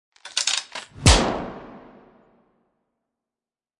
GASP Sniper Load and Fire 2
Sound FX for loading and firing a sniper rifle - variation 2.
Shot, Weapon, FX, Sniper, Action